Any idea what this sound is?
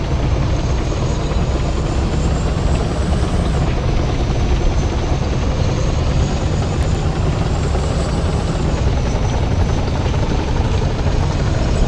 engine4 loop
Engine and mechanical sounds of a tank made into a loop.